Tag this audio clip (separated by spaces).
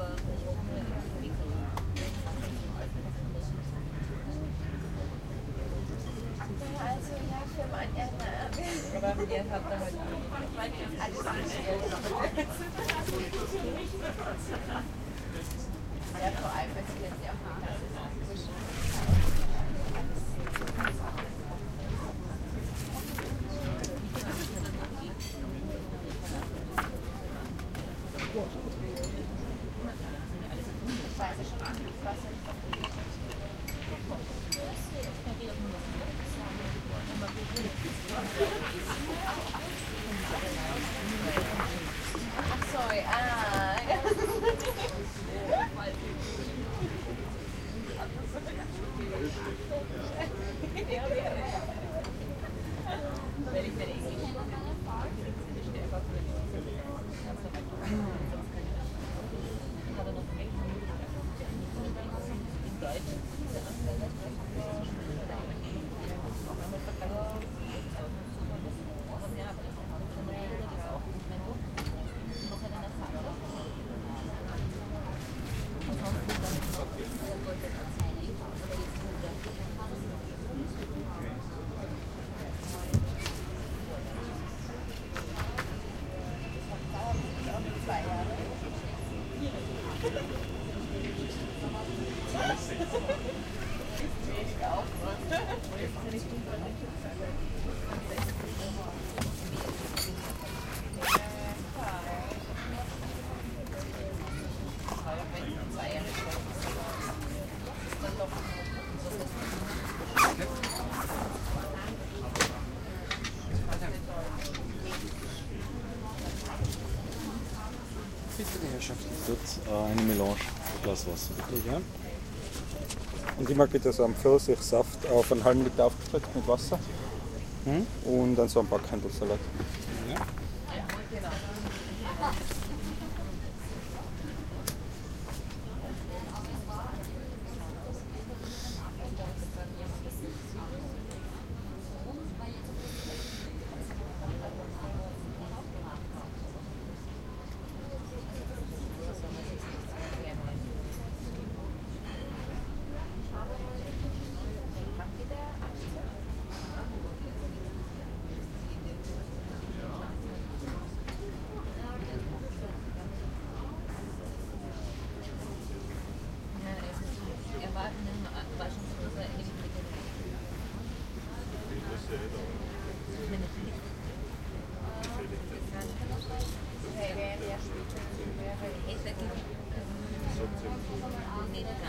vienna praterallee people field-recording restaurant